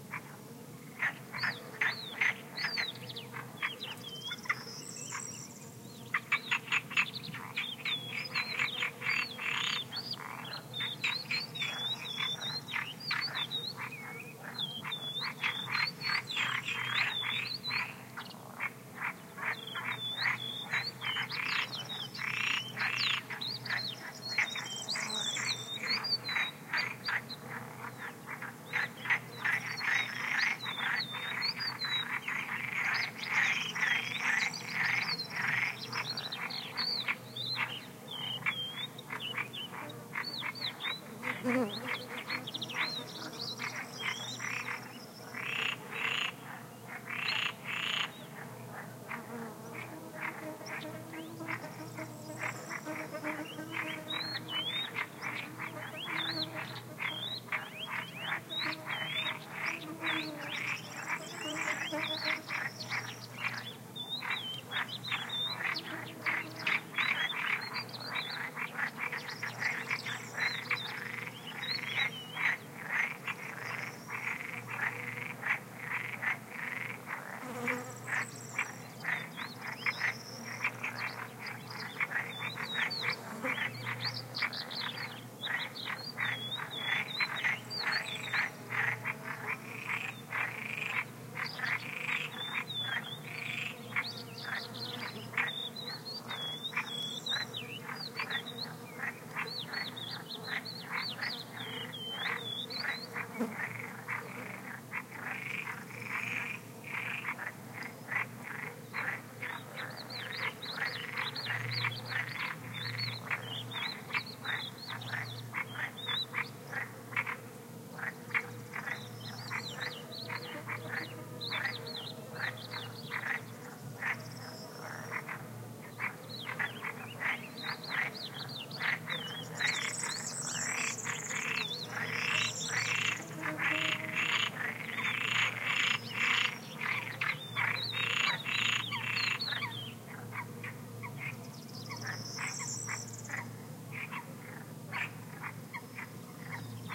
20060326.marshes.morningchorus.02
marsh ambiance in the morning, including frog croaks, several species of birds singing (serins, coots, crested larks, etc) and insects. Filtered and amplified. Rode NT4> FelMicbooster>iRiverH120(rockbox) / ambiente de marisma por la mañana con croar de ranas, and diversas especies de pajaros (verdecillos, fochas, cogujadas...) Filtrado y amplificado